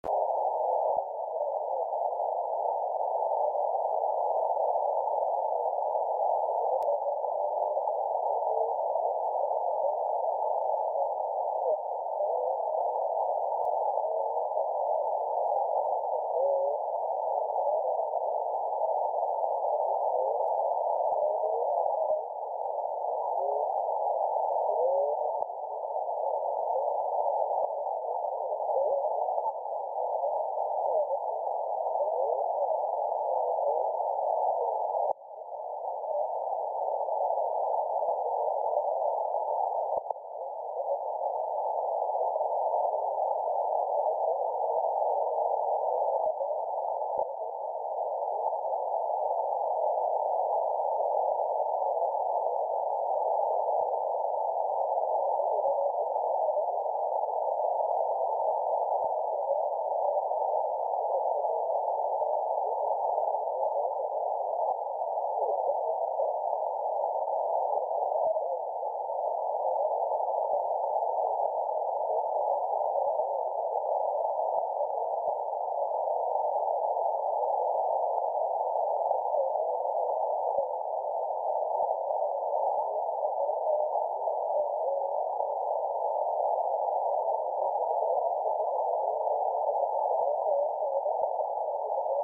Empty Station
Found while scanning band-radio frequencies.
bending, Broadcast, circuit, FM, lo-fi, media, noise, radio, Sound-Effects